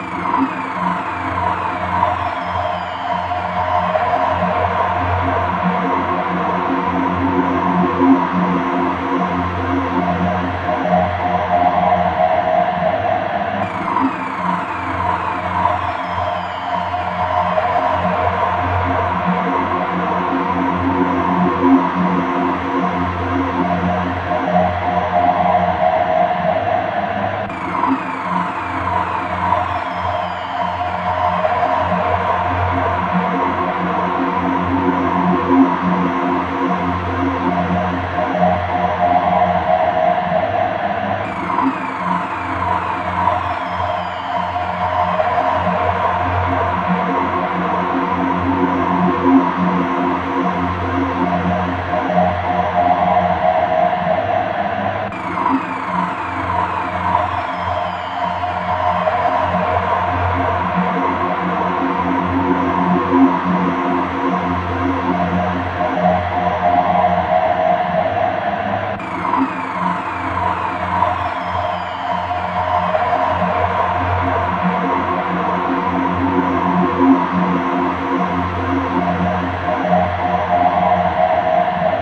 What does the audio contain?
Lurking in the Dark
This sound is from a collection of Sound FX I created called Sounds from the Strange. These sounds were created using various efx processors such as Vocoders, Automatic filtering, Reverb, Delay and more. They are very different, weird, obscure and unique. They can be used in a wide variety of visual settings. Great for Horror Scenes, Nature, and Science Documentaries.
Sound, Ufo, big, discovery, dark, FX, confused, Universe, disturbing, Transformational, Unique, Space, aggressive, bright, calm, Soundtrack, chaotic, Weird, Nature, Strange, Efx, Different